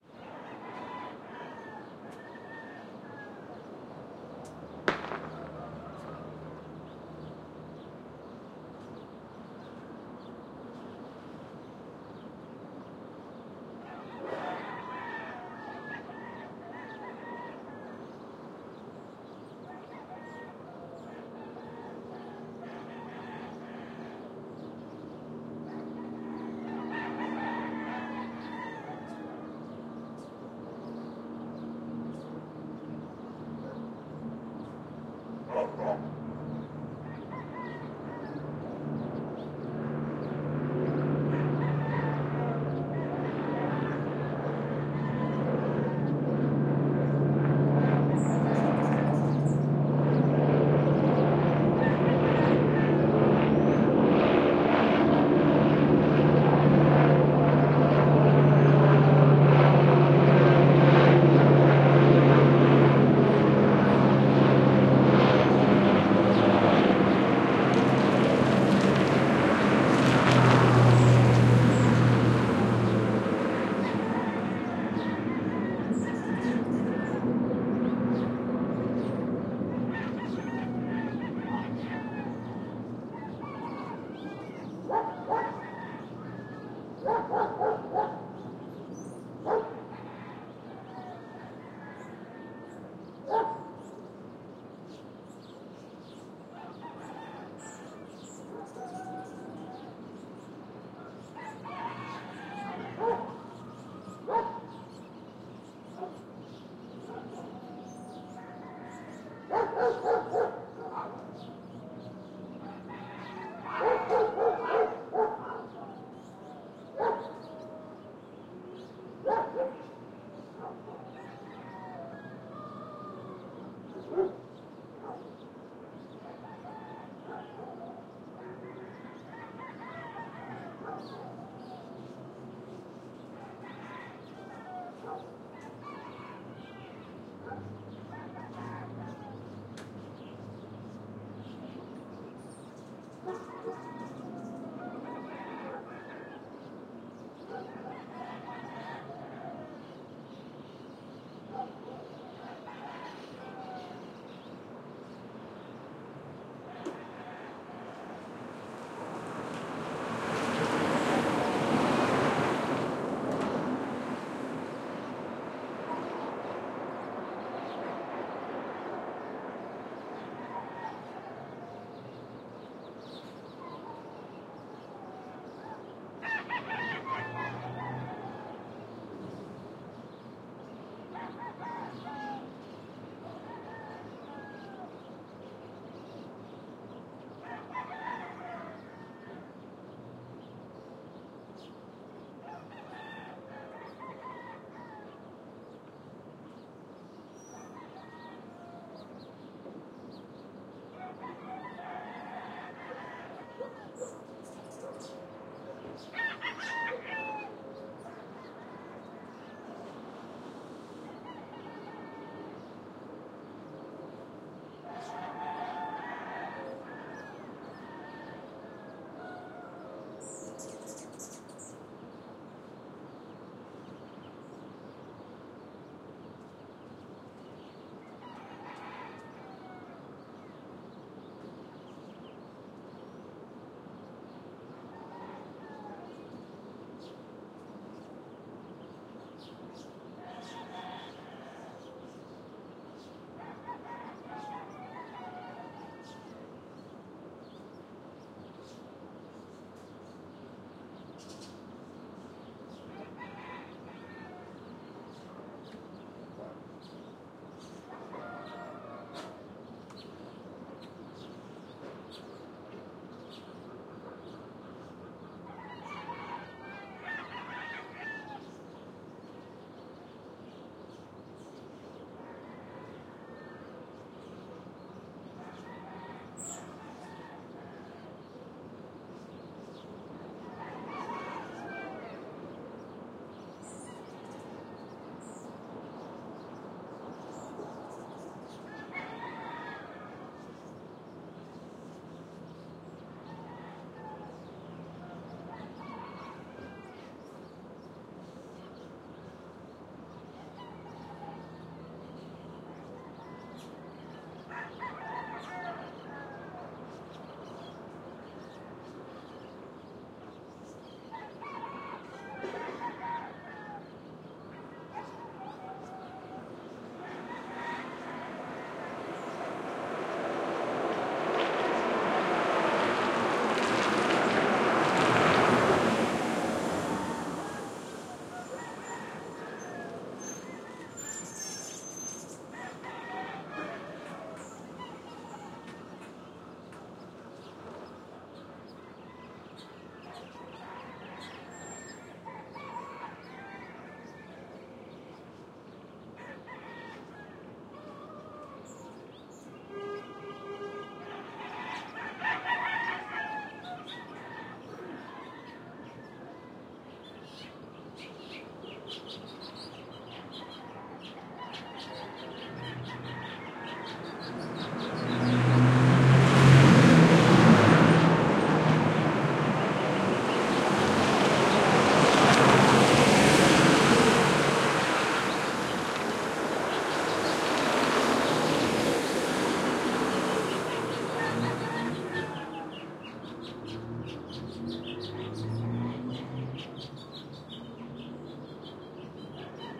AMB S EAST LA MORNING 6
My wife and I just recently moved to East Los Angeles, and the sounds are new and wonderful. Lots of chickens, no more automatic sprinklers, and lots of early riser heading off to work. This is a stereo recording of the early morning in our new neighborhood.
Recorded with: Sound Devices 702T, Beyerdynamic MC 930 mics